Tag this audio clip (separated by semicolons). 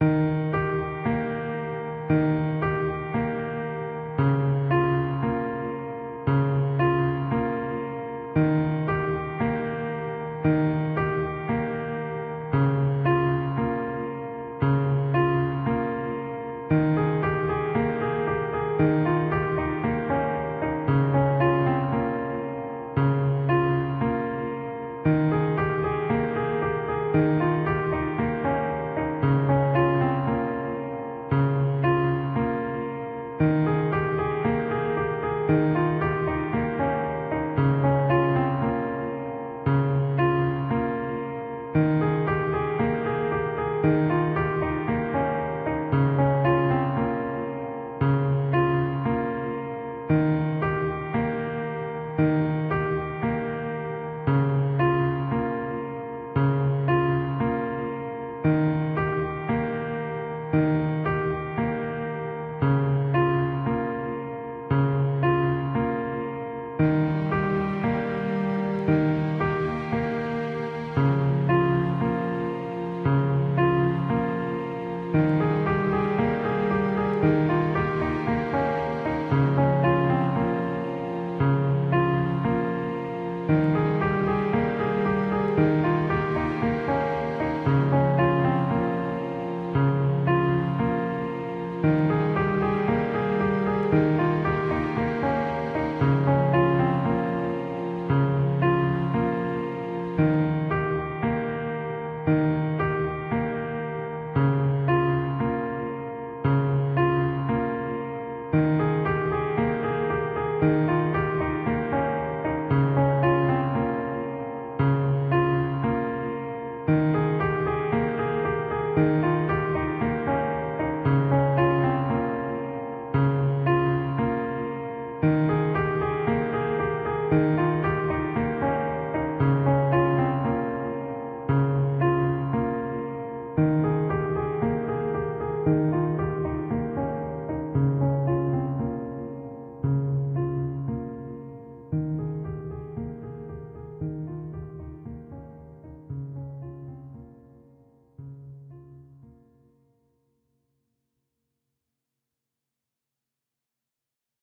ambience; japan; loop; music; Piano; song; string; summer